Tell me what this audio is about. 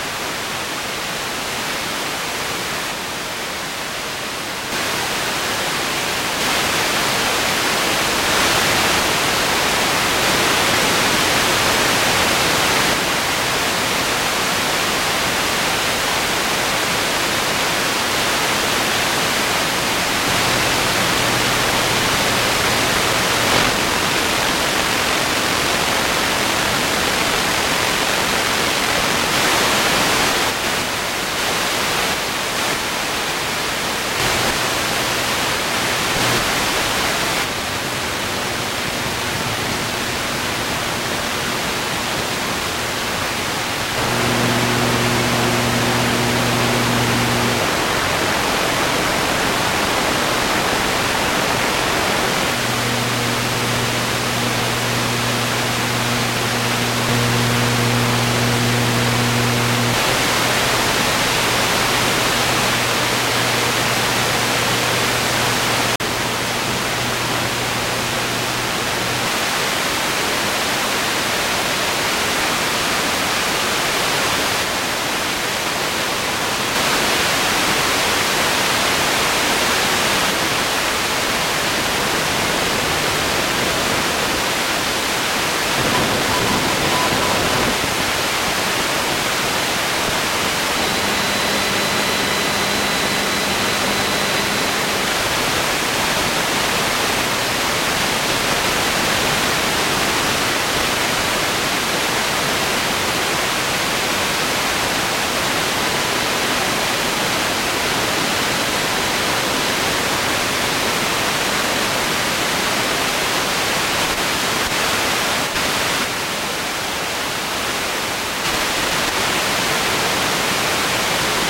TV Static Morphagene Reel
Included on this Reel are 40 Splices of static, captured while scanning the VL, VF and UHF bands on an analog television set. Since the U.S. conversion to digital broadcast signals in 2009, these bands have been providing endless streams of noise for all to enjoy.
analog-broadcast, morphagene, static